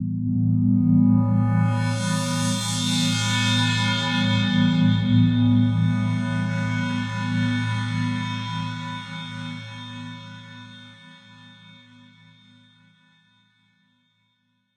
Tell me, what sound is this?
Crystal Mirrors - 4
A luscious pad/atmosphere perfect for use in soundtrack/scoring, chillwave, liquid funk, dnb, house/progressive, breakbeats, trance, rnb, indie, synthpop, electro, ambient, IDM, downtempo etc.
130, 130-bpm, ambience, atmosphere, dreamy, effects, evolving, expansive, house, liquid, long, luscious, melodic, morphing, pad, progressive, reverb, soundscape, wide